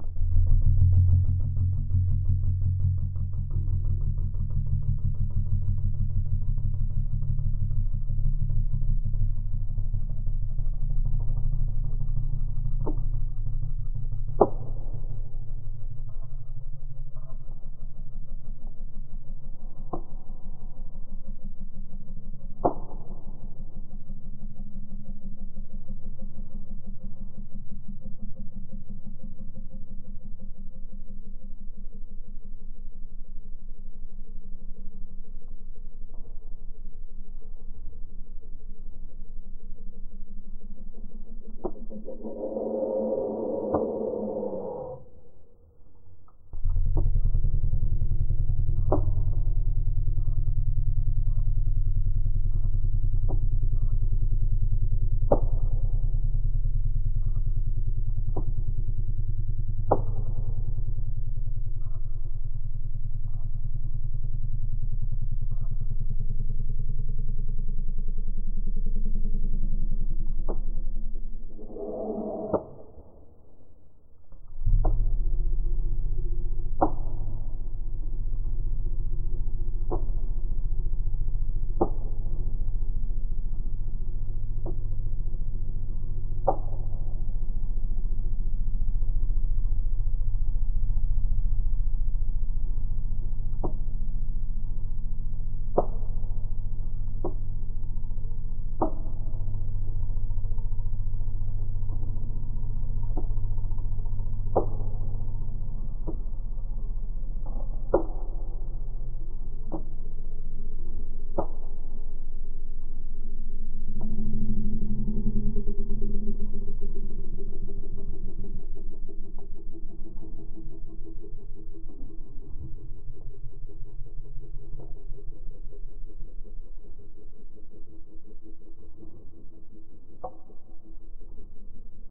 Drone sounds that are Intense and scary. Super Deep Bass.
nightmare, spectre, spooky, moan, Halloween, growl, Drone, evil, greoan, horror, devil, fearful, ambiance, demon, zoltok, professional, pure, sinister, hd, phantom, intence, quality, high, ambience, fear, besthorror, ghost, scary